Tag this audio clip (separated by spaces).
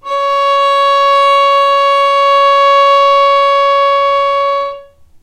non vibrato violin arco